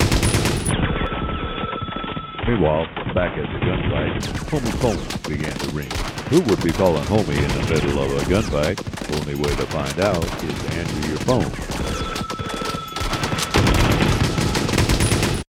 Homey with Gunfire
This is Homey and his phone at the gunfight with gunfire from alienbomb and telephone sounds from petaj.
ringtone, phone, voice-over, voice, male